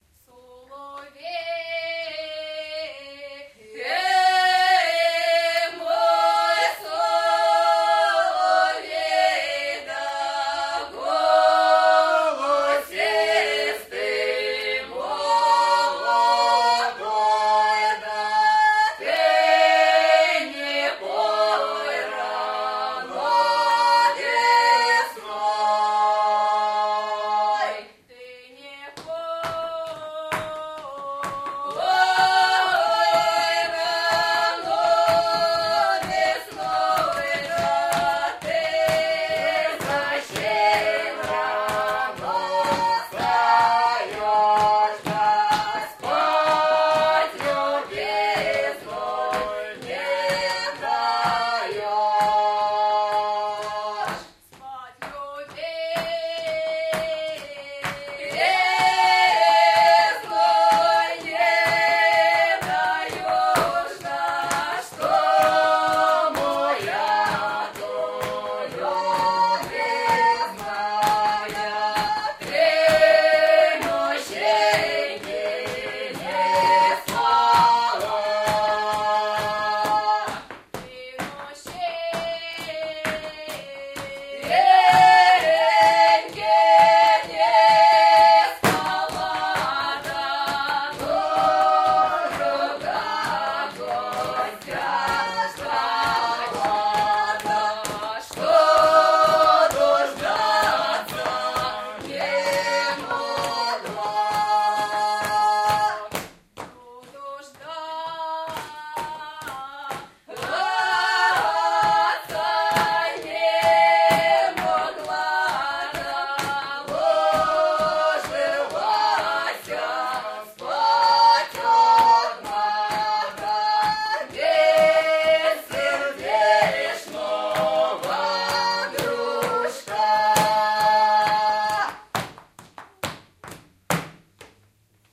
traditional song performed live.
recorded may 2002 in yekaterinburg on minidisc with Soundman binaural microphones
field-recording, folk-song, ural, yekaterinburg